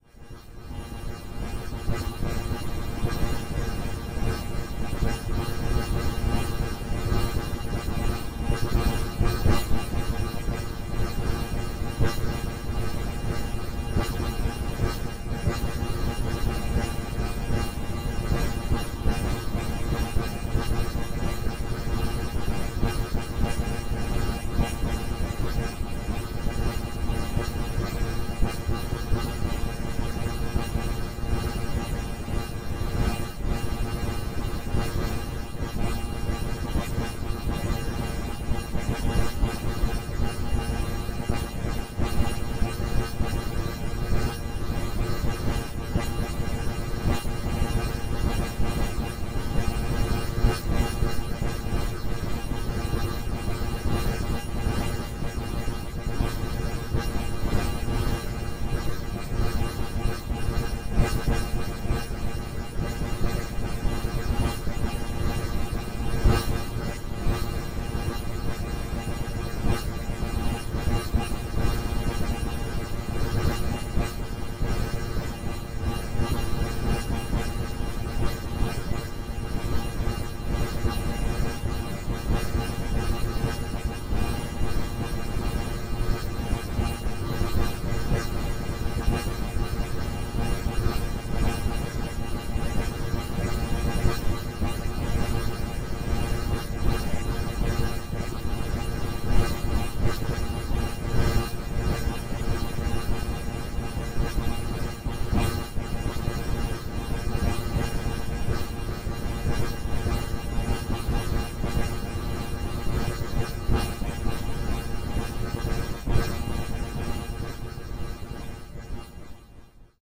1.This sample is part of the "Noise Garden" sample pack. 2 minutes of pure ambient droning noisescape. Nice bubbling harmonic noise.